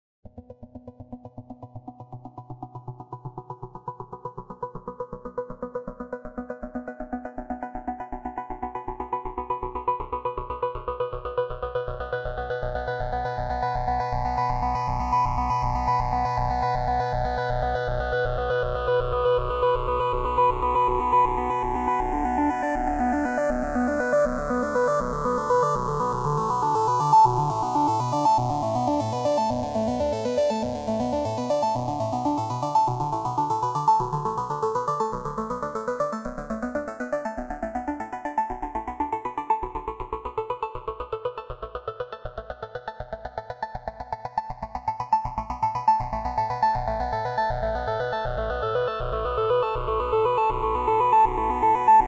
Dreams Of My Machine
This is a loop that I made with a homemade synth.
It is an arpeggiation of a few chords.